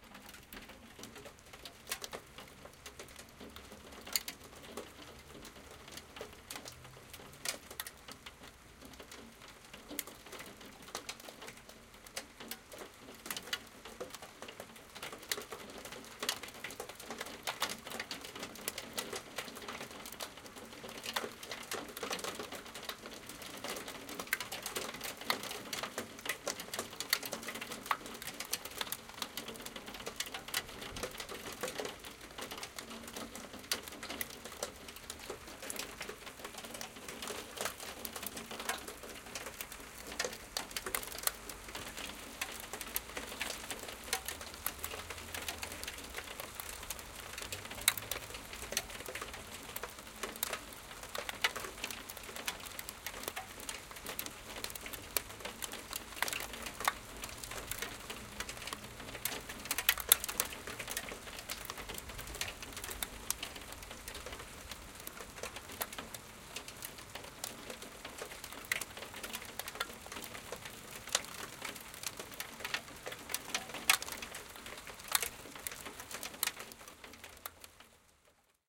Hail Stones hitting tin
Recorded using a pair of Rode lavalier microphones from a loft window. The hail stones were hitting the metal flashing (I think that it what it is called) around the window. Loved the rhythm and hypnotic nature of this.